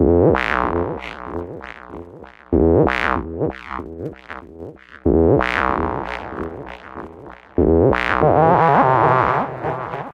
An All Around Tech Sound.
synth, dance